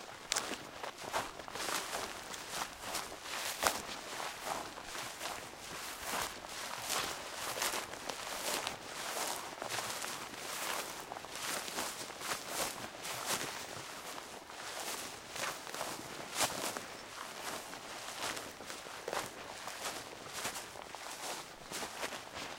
20060510.walk.herbs
noise made while walking on drying herbs. Sennheiser ME62(K6)>iRiver H120 / ruido hecho al caminar sobre hierbas casi secas